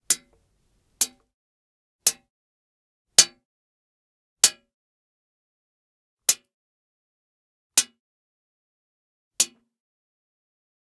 Throw stones on window glass
throwing small stones against the glass in the record room
stones, throw, window, glass